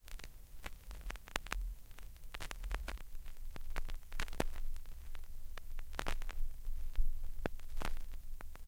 The couple seconds of crackle after an old vinyl record ends.
Recorded through USB into Audacity from a Sony PSLX300USB USB Stereo Turntable.